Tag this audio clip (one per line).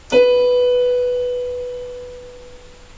Notes
Piano